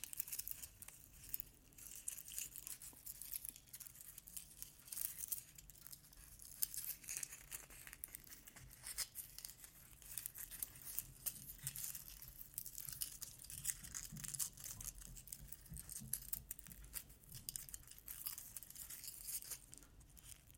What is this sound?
oeuf.poussiere 02
biologic, crack, crackle, eggs, organic